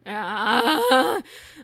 a sound of exasperation
exclamation, exasperated, sigh